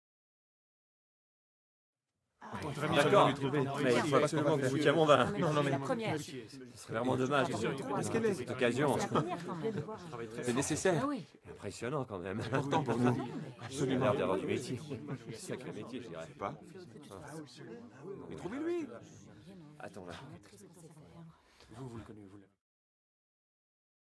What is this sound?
WALLA waiting for the man
A small crowd is anxious about the arrival of an important guest. (unused material from a studio French dubbing session)
ambience, dialogue, french, vocal, walla